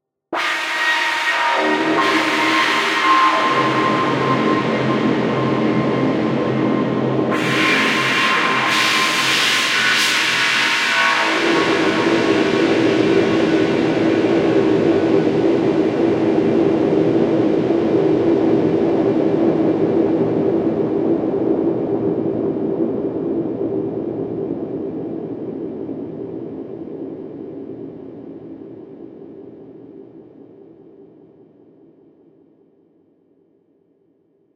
A loud synthesized scary blood-curdling scream. Part of my screams pack.
atmosphere, dark, electronic, fear, howl, noise, pain, processed, scream, synth, voice